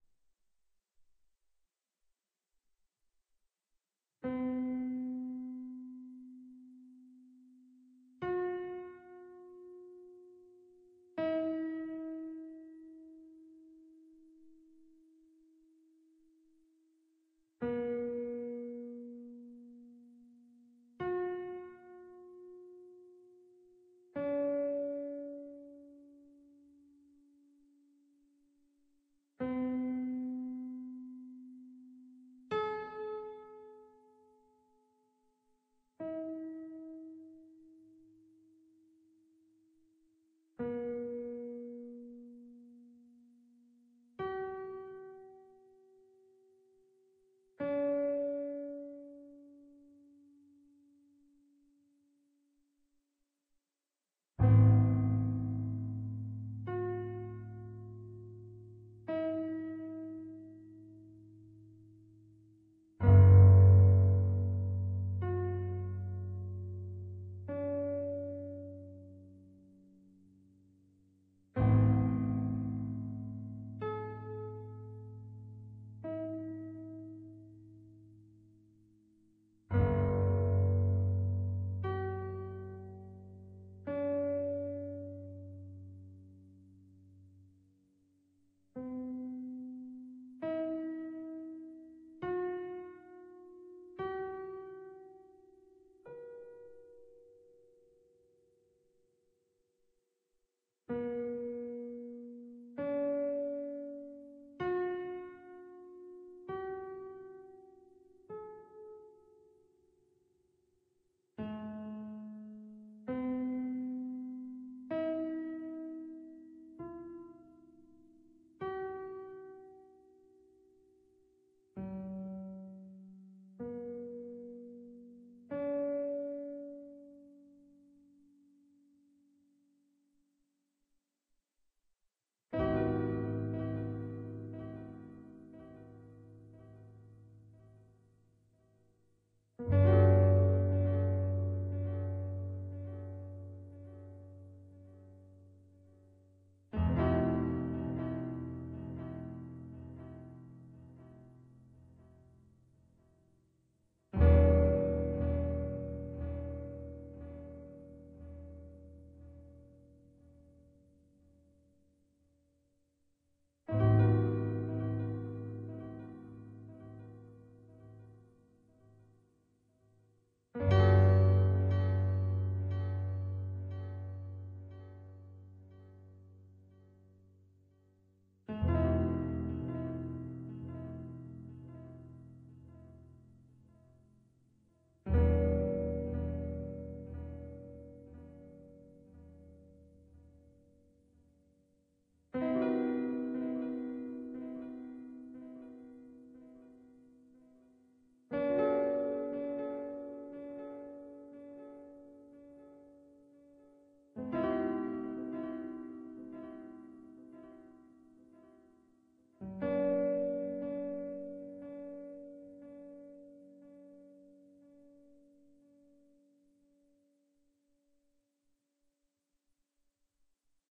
Relaxing piano music created for various purposes. Created with a synthesizer, recorded with MagiX studio. Edited with audacity and MagiX studio.
100th sound! thank you all for the downloads, comments and follows!